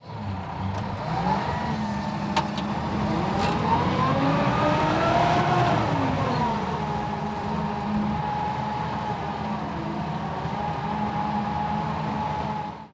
Sound of tractor on landfill using lifter. Recorded on Zoom H4n using RØDE NTG2 Microphone. No post processing.